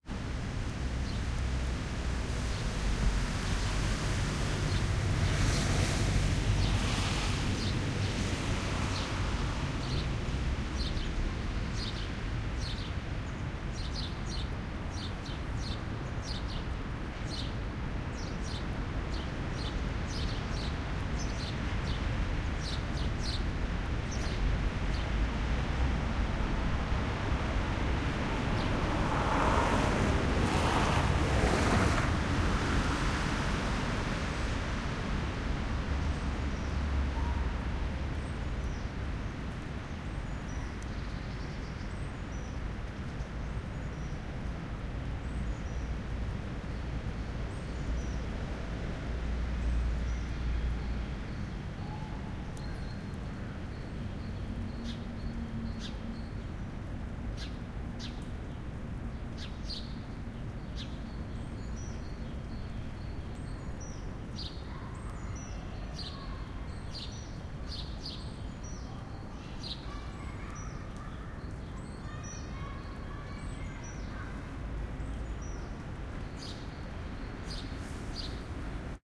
Suburbs-Helsinki-spring
A sidestreet in suburb of Helsinki. Spring 2020, light rain almost mist, smoe birds, a car passes. Reflections from nearby buildings, constant rumble of main street in background.
Stereo AB pair Line Audio CM3 -> Tascam HD-P2 -> normalization